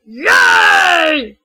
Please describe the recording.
a weird monster voice I do randomly